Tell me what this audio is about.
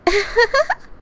The sound of one girl giggling.